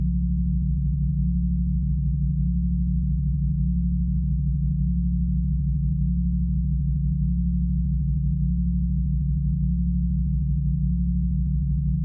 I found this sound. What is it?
Low computing unit hum 3(chrs)
Low frequency humming sound. Can be applied to a variety of sound designs. Enjoy it. If it does not bother you, share links to your work where this sound was used.
drone, effect, sfx